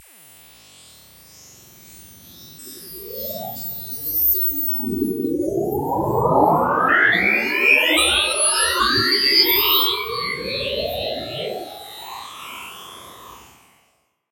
Fun with Bitmaps & Waves! Sweet little program that converts bitmap photos into sound! Added some reverb and stereo effects in Ableton.